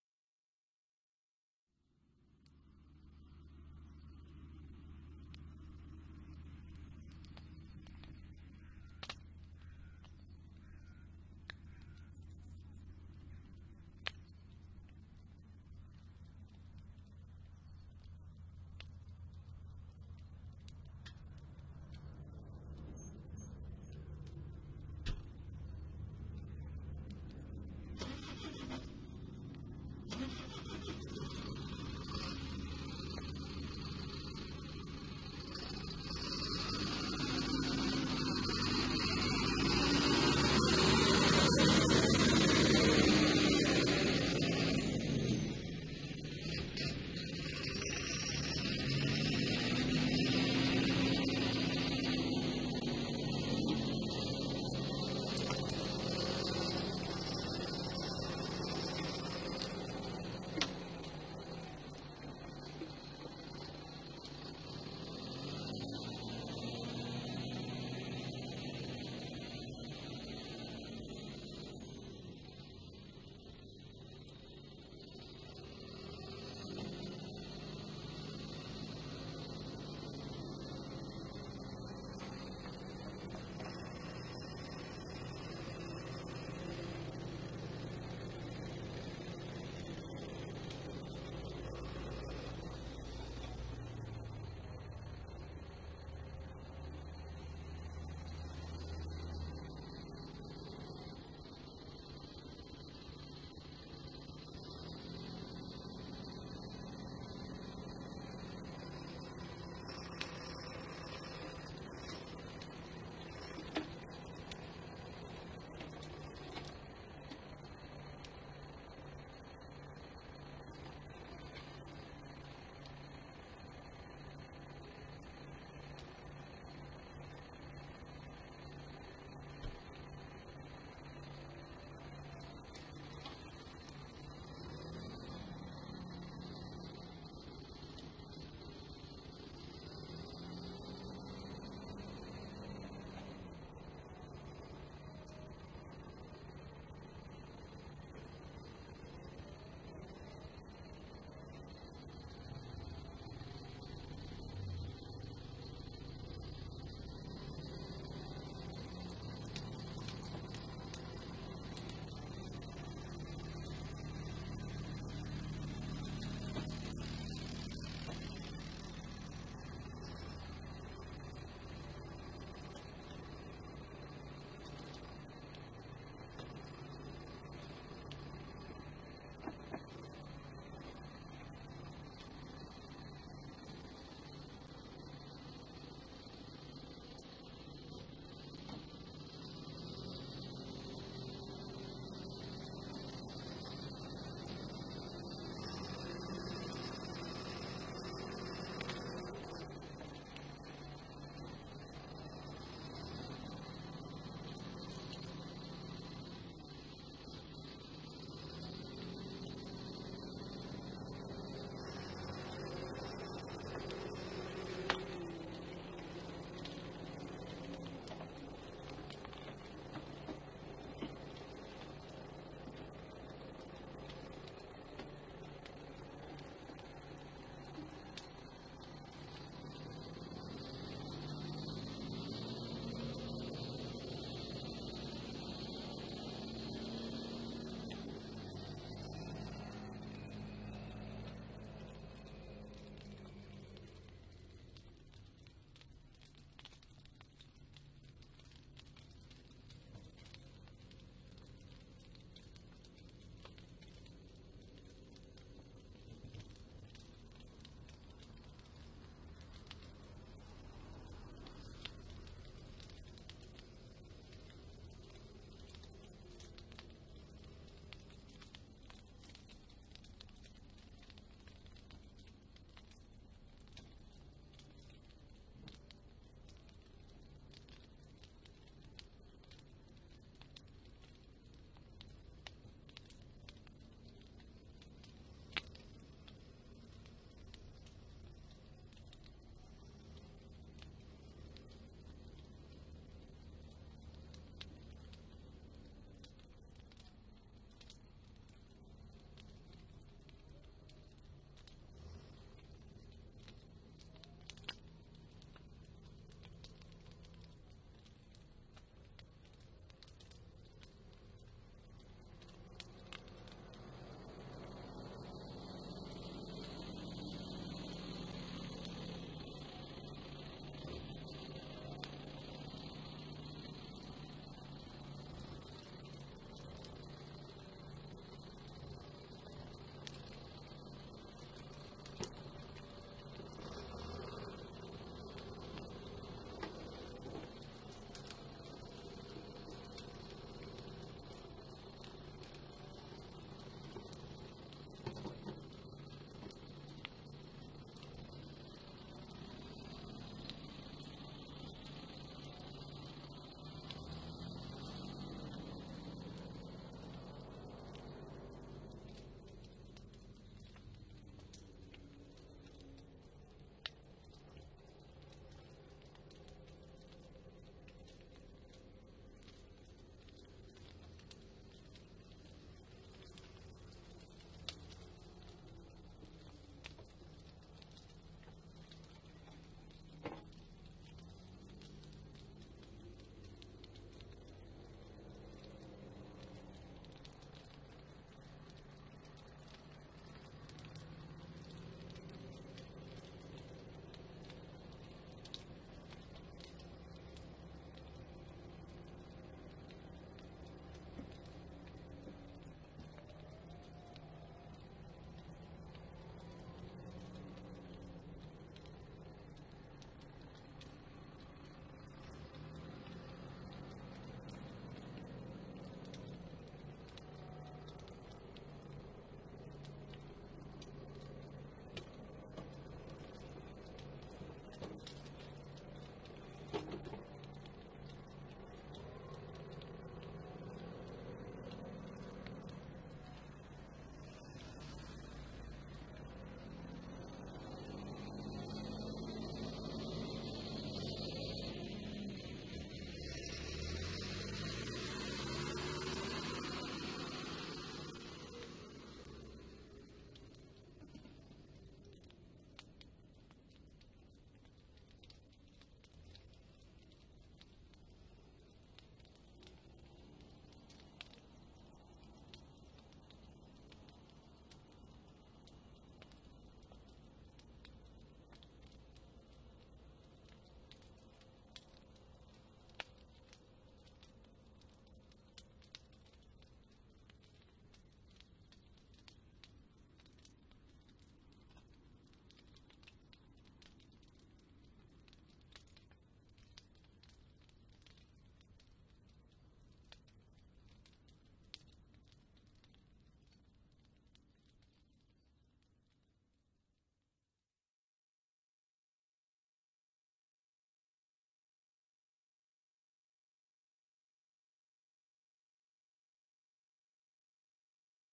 'Loudspeaker binaural'.Ready to play Ambiophonic demo.
Place two speakers one foot apart between driver centres, and three feet in front of you, preferably near the centre of the room, away from large reflecting objects. Play normally as if stereo. Try to find the best sweet spot from there. The sound should image way beyond the speakers in depth and to the sides, possibly behind as well with an optimum setup (tractor start and end, cars and quad bike at times).
This will not sound good on headphones, nor with 'normal' 60 degree stereo speaker placement, nor on internal PC speakers. It needs to be downloaded, rather than using the built in flash? player, to have a decent chance of working well. If using a PC make sure that any 'enhancements', such as SRS, are turned off, as it will destroy the effect. Similarly for a HT setup, make sure DTS, Dolby, Panarama etc. are not enabled.
For the more discerning Ambiophonic afficionados everywhere..